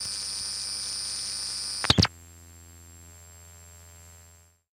Radio Noise & Blip
some "natural" and due to hardware used radio interferences